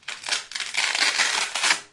two velcro stripes being pulled up. Sennheiser ME62 + ME66 > Shure FP24 > iRiver H120